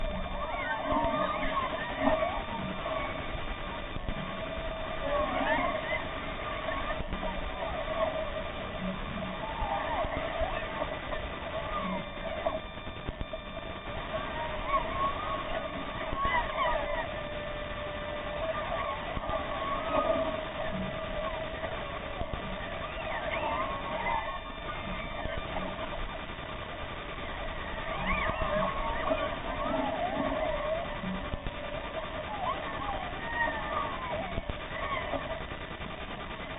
radio reception noise with alien girls voices modulations
electronic algorithmic sonic objects
radio-astronomy, exomusicology, alien-voices, sonic-object, alien-girl